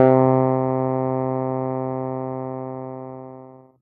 mt40 ep 060
casio mt40 el piano sound multisample in minor thirds. Root keys and ranges are written into the headers, so the set should auto map in most samplers.
keyboard, multisampled, synthesised, digital